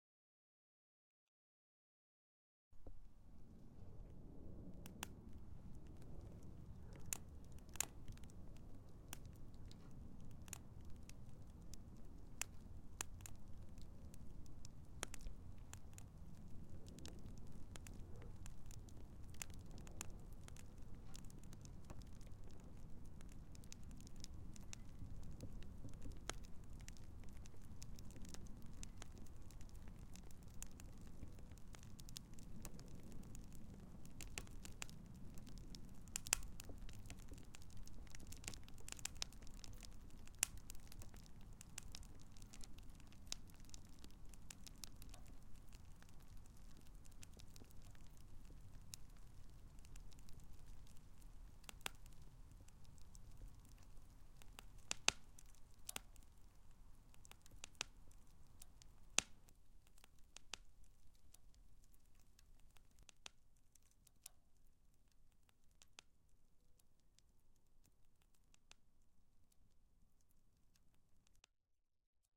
This is a recording of a very vivid, and rather large fire.
Fire, Bonfire, Camping, Campfire